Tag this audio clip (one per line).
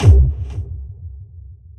Bassy
Bow
Deep
Kick
Short